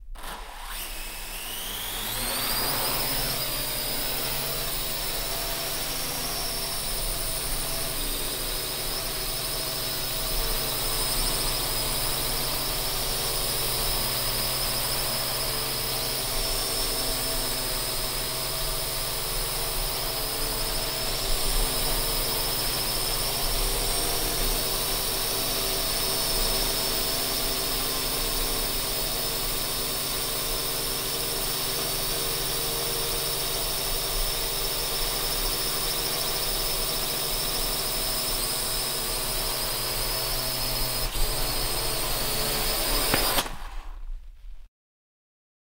Quadrocopter recorded in a TV studio. Sennheiser MKH416 into Zoom H6.